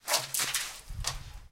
Hombre callendo al asfalto
hit, Wather, asfalto